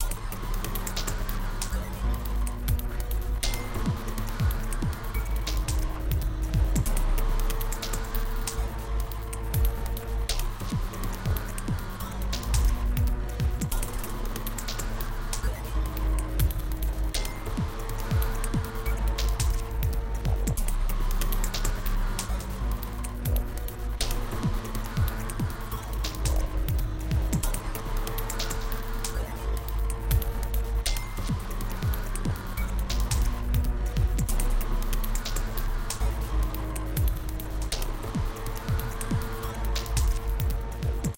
repetitive loop acidized and rendered in high quality.
ambient, beats, drone, idm